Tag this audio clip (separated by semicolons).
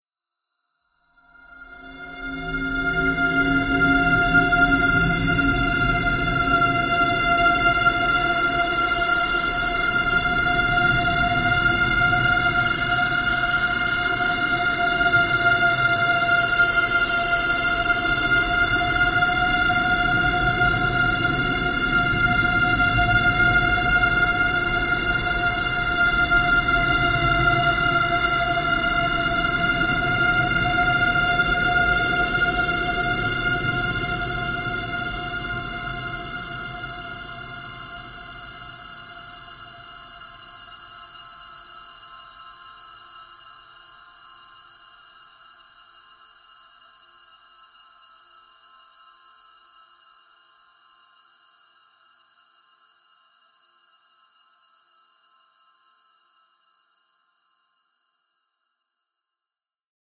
cinematic; divine; multisample; pad; soundscape; space